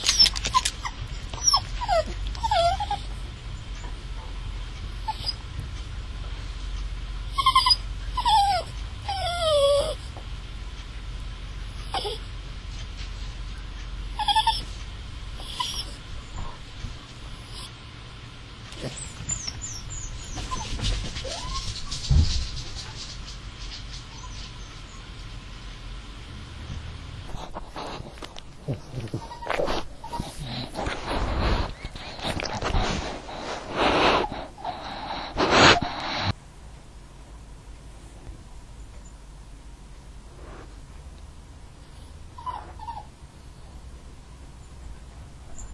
My toy poodle whinging, and my English Bulldog sniffing the digital recorder
bulldog, bulldogge, cry, dog, poodle, sniff, snort, whine